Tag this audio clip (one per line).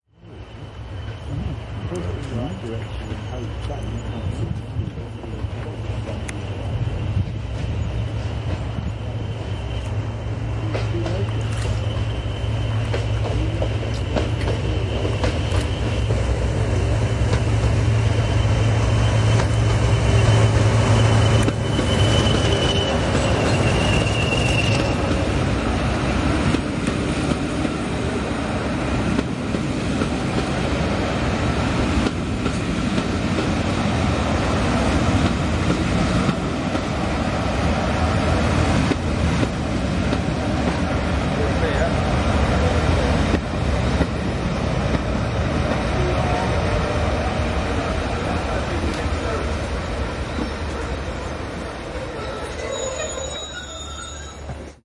Diesel
field-recording
UK
Vintage